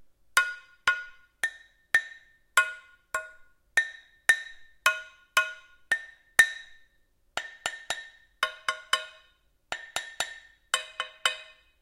Kitchen Pot 02
This recording is from a range of SFX I recorded for a piece of music I composed using only stuff that I found in my kitchen.
Recorded using a Roland R-26 portable recorder.
Kitchen, Percussion, Cooking, Indoors, Home, Household, Foley, House